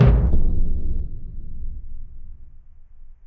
Two big hits on a tom. Sorry, I forgot to mention, this is yet ANOTHER sample made with teleport8's fantastic drum set!